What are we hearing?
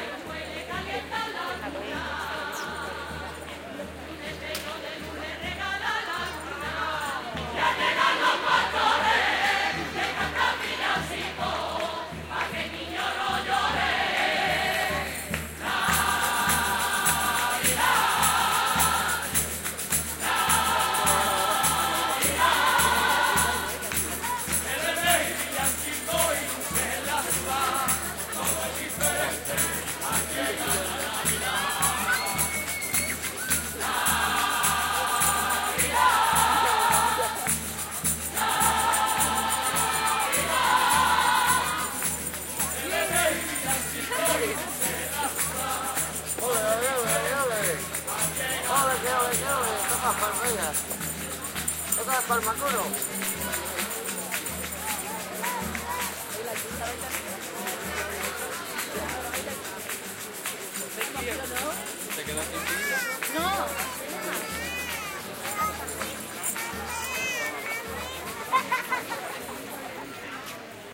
southern Spanish christmas chorus singing in the street, some percussion, and other voices / coro de campanilleros cantando en la calle, panderetas y otras voces